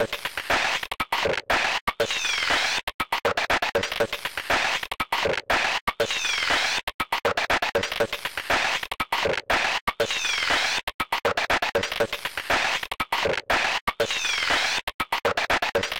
Static Break
Drum pattern constructed from radio static sounds.
glitch, noise, static